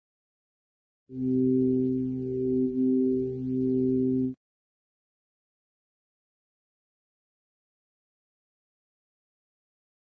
video game sounds games
game, games, sounds, video